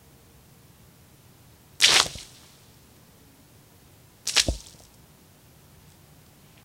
Water hitting cement. splash
splat, splash